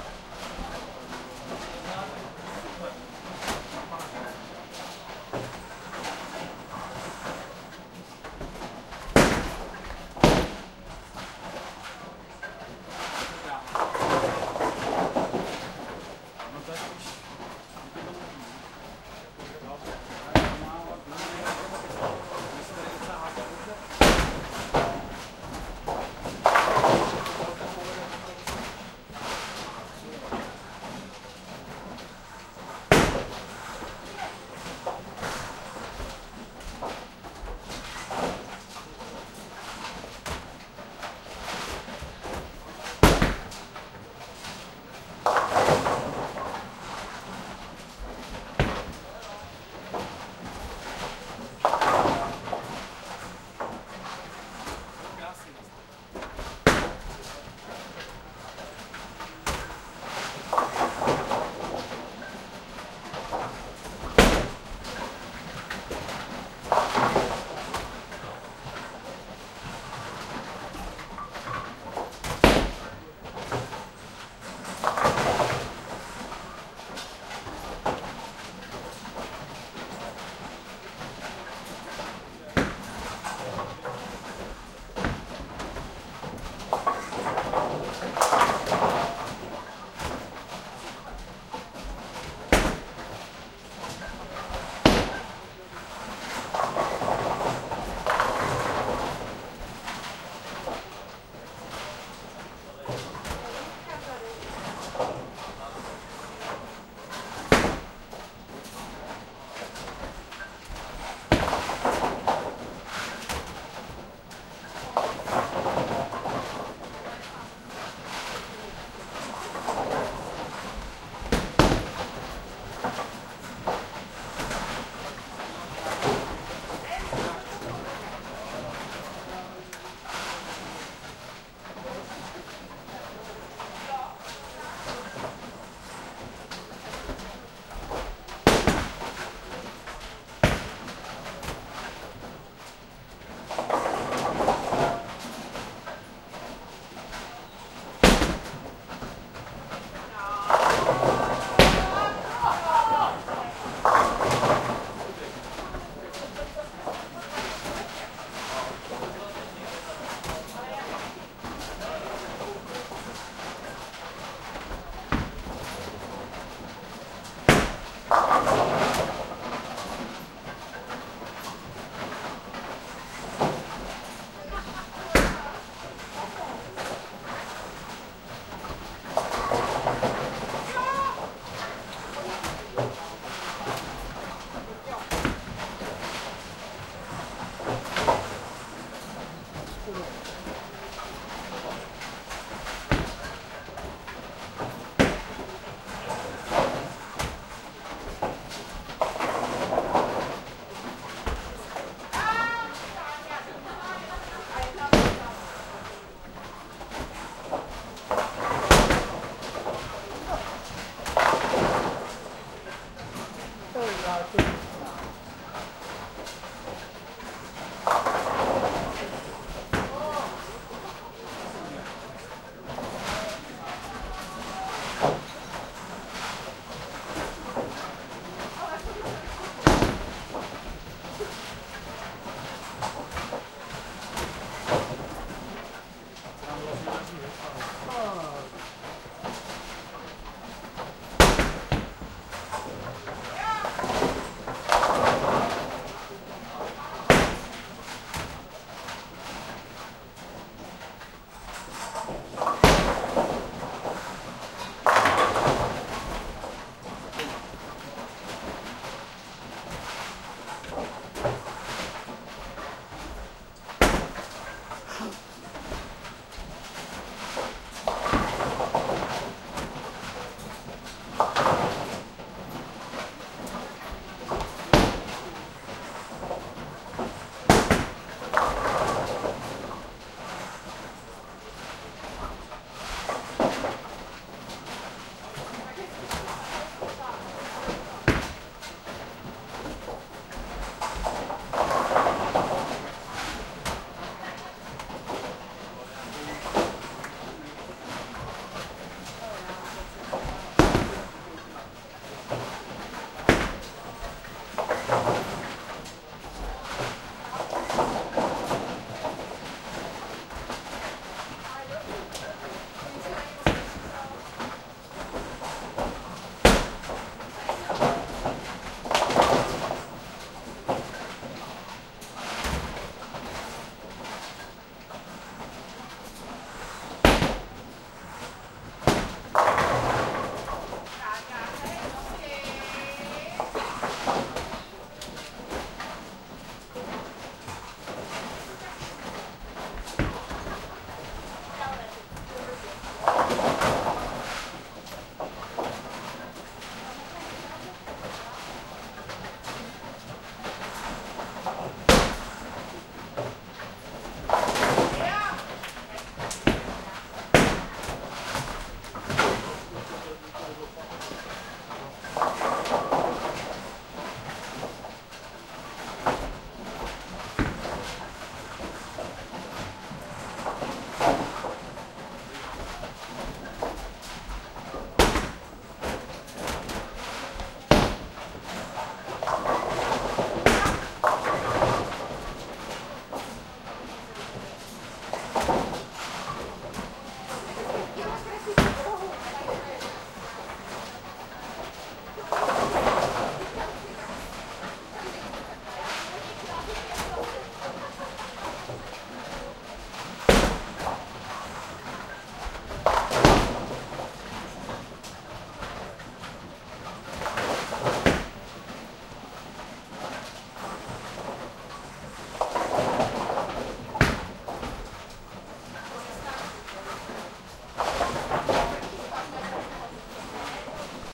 sound of bowling game with players in the pub.
bowling, bowling-lanes, bowling-machine, pub